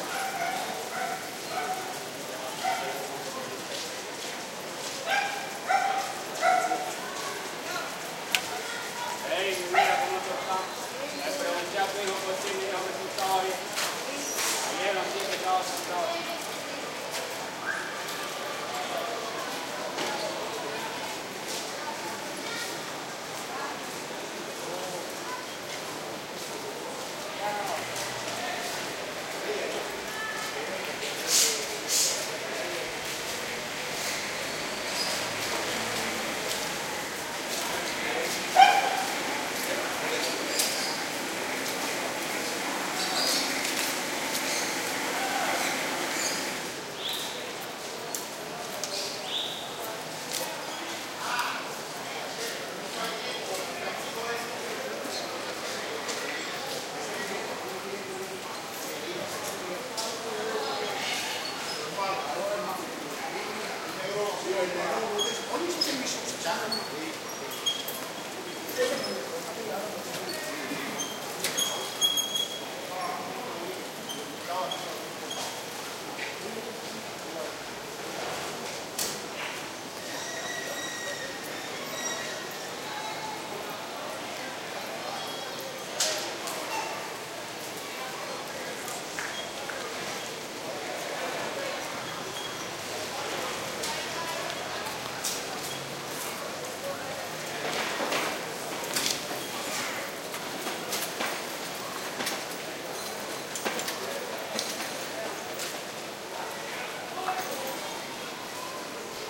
city town Havana afternoon balcony
city town old Havana afternoon balcony
havana, old, town